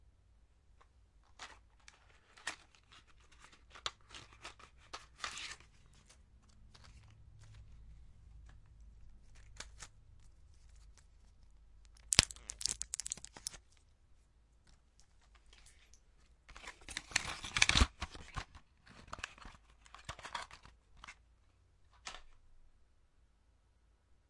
blisterTabletsSeizure Anaferon
Seizure tablets from the blister.
Plastic blister with metal underlay.
Tablet diameter aprox. 9mm.
AB-stereo
metal-underlay, medicine, blister